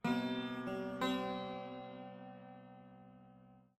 sarod intro2
Sarod w/no processing. intro riff.
indian, raga, sarod, world